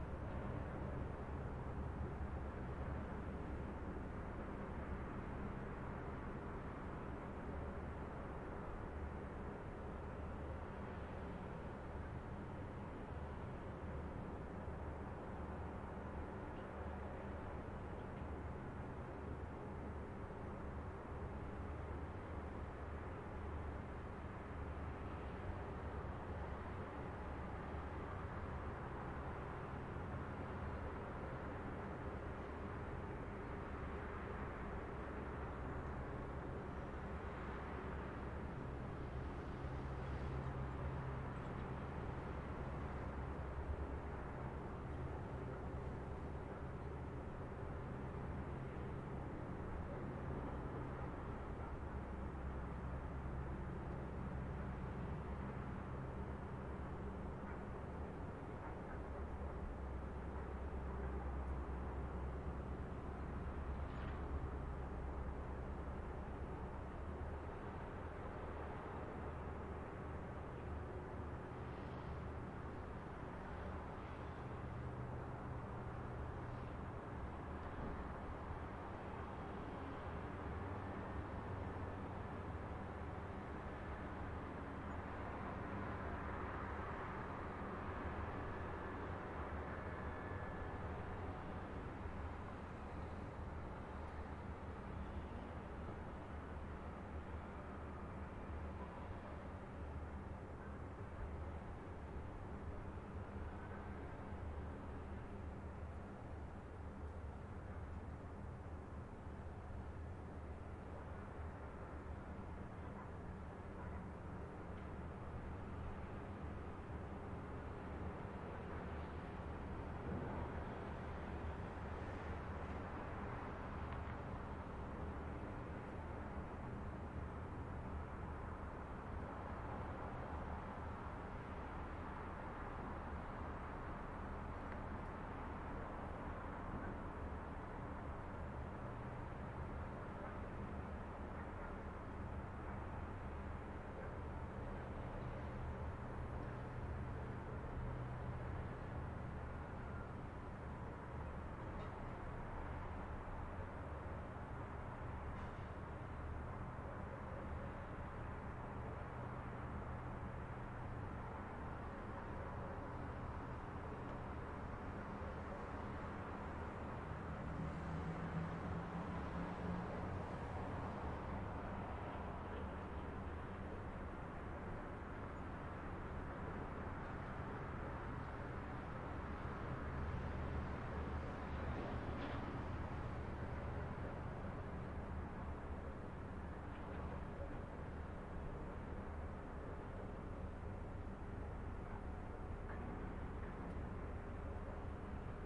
Ambience EXT night subcity from balcony distant light traffic (lisbon portugal xabregas)
Field Recording created with my Zoom H4n with its internal mics.
Done in 5/2017
Ambience, EXT, from, light, night, traffic